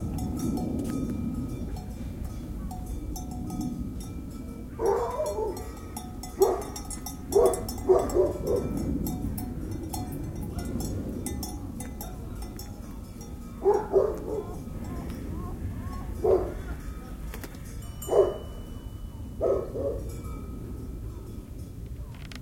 Istanbul ambience princes island
Record ambience at the mountain on Princess Island, Istanbul.
nature, field-recording, ambience, birds, istanbul